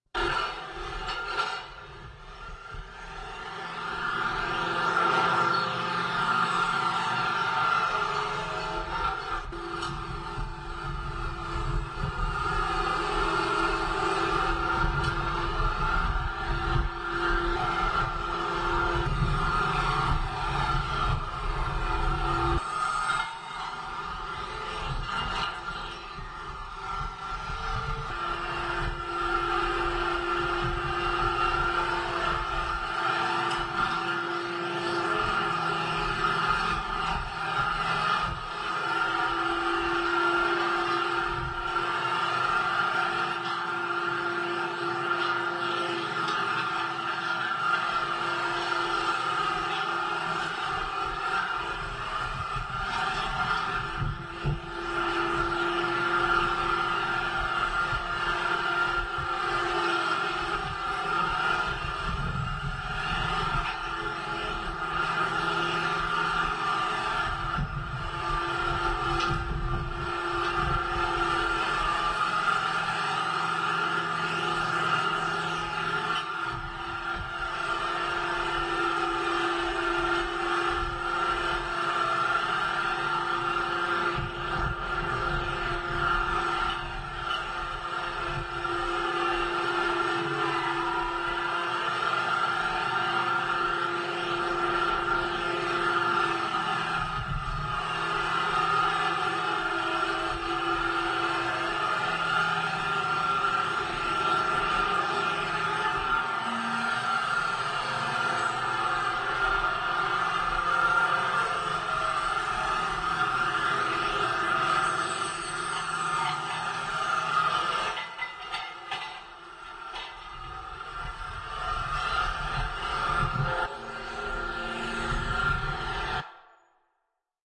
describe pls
a dozen or so men repairing potholes on a busy city street.
There's a substantial amount of wind noise, so this sample may (or may not) be useable.
Approx 2 minutes long